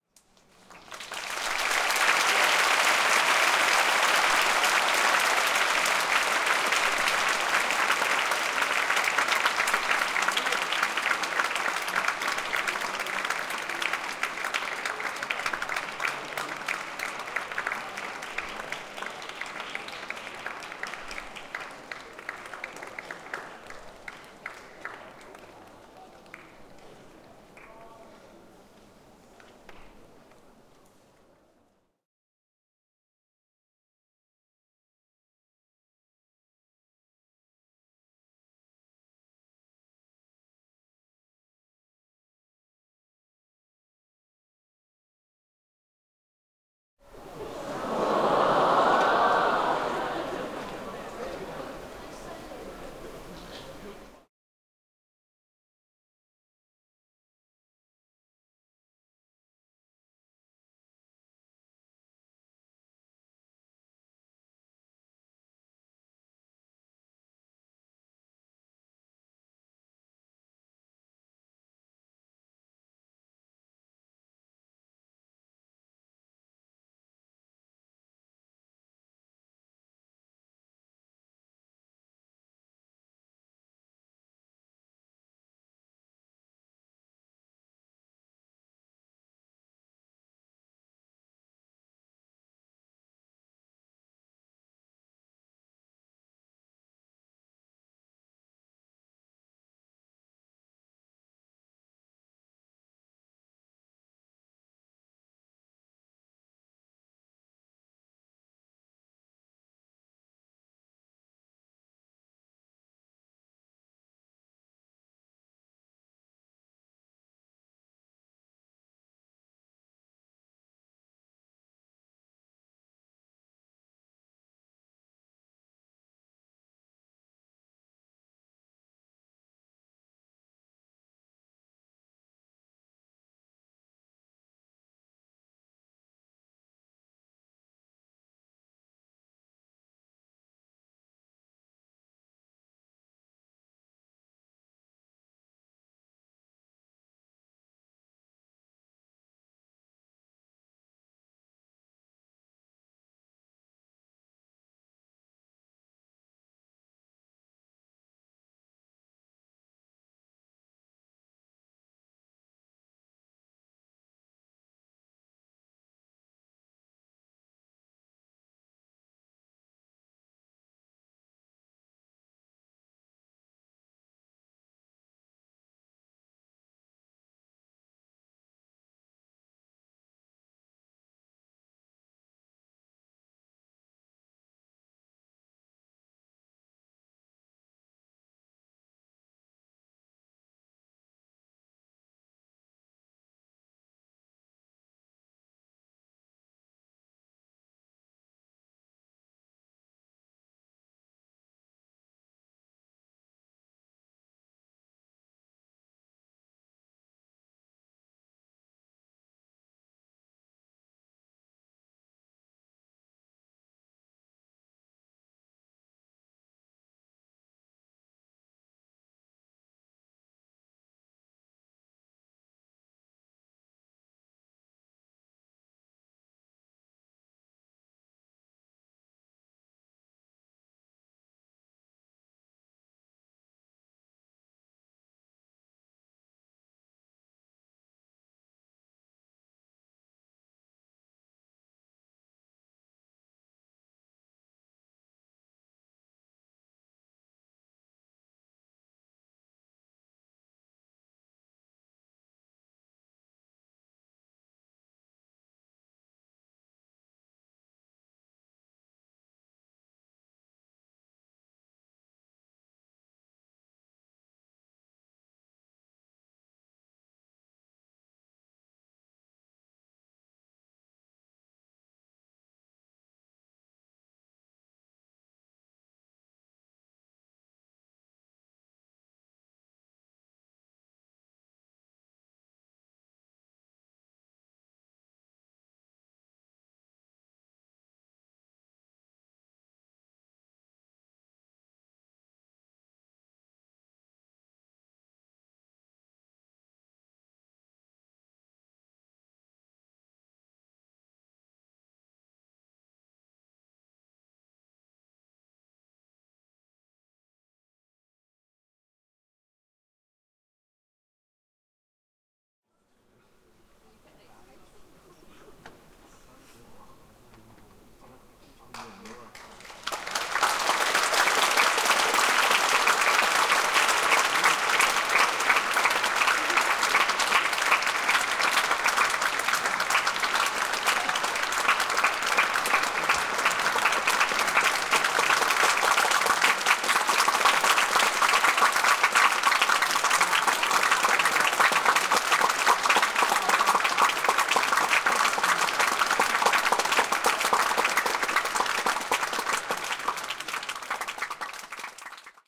Applause longer
A stereo field recording. This is the applause before the curtain call of a local drama production, recorded at the circle of the Hong Kong Cultural Centre Grand Theatre. Recorded on an iPod Touch using RetroRecorder with Alesis ProTrack.
crowd, human